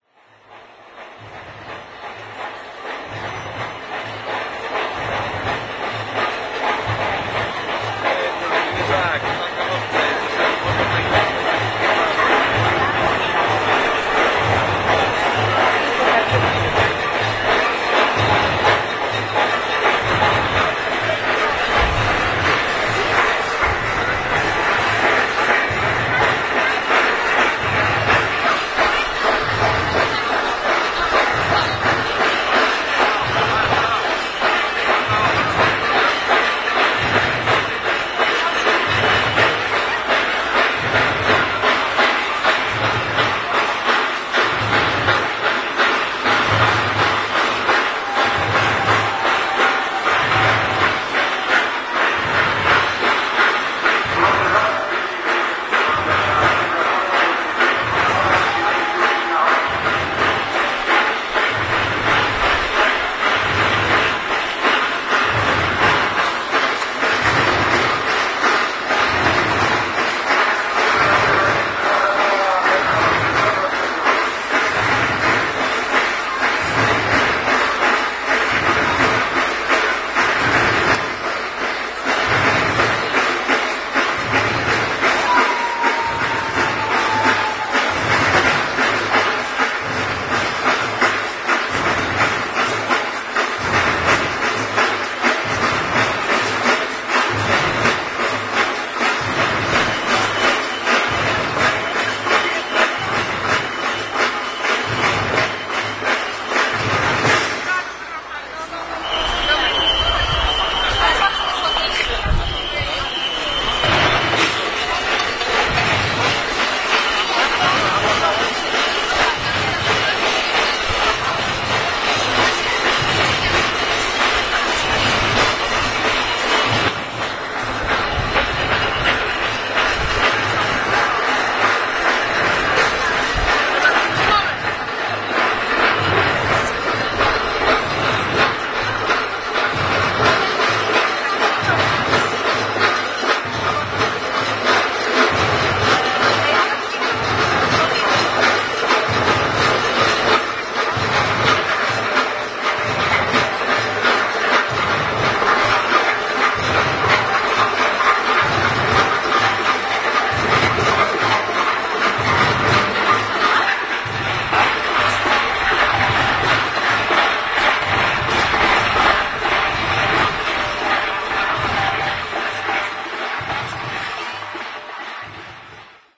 pochod idzie1
11.11.09: between 14.00 and 15.00. the ceremonial annual parade on the street Św/Saint Marcin day name
poland, poznan, voices, bass-drum, parade, street-name-day, crowd, people, national-holiday, saint-marcin, field-recording, street